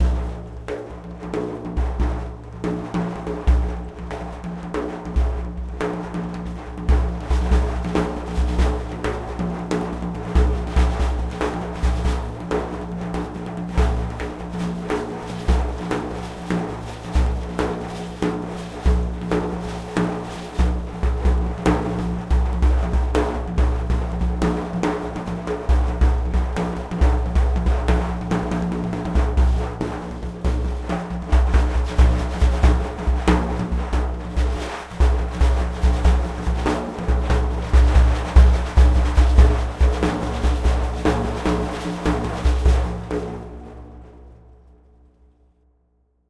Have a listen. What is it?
impro binaire 140
4/4 daf impro with rode NT4 mic, presonus preamp